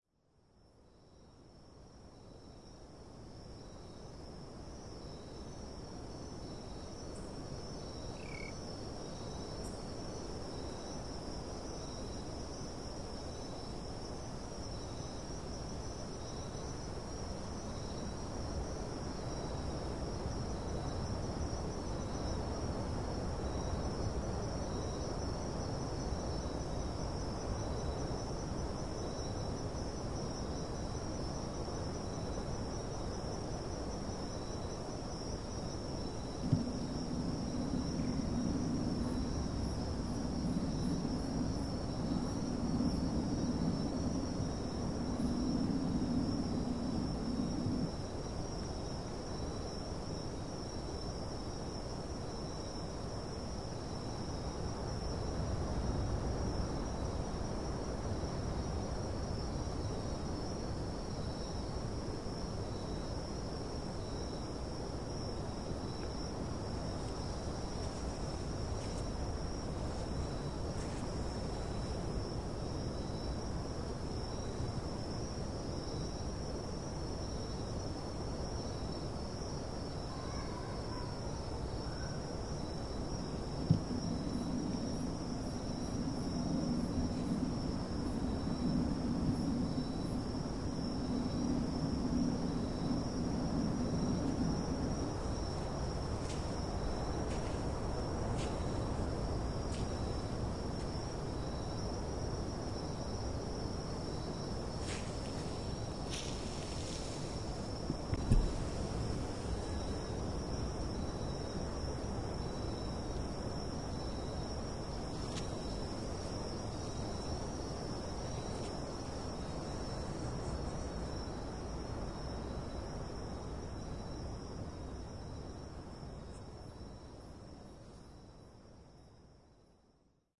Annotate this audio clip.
FR.PB.NightAmbience.2
NightAmbience at PraiaBranca, Brazil. Sea-waves as background, several kinds of insects making their performance, some weir sounds of hidden animals(perhaps just dogs). I swirl the mic while recording
summer, nature, mzr50, outdoors, field-recording, insects, night, ecm907